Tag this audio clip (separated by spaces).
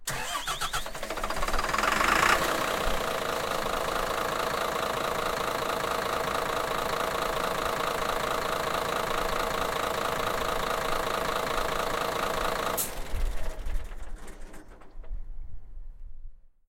bus engine exterior shutdown start transportation vehicle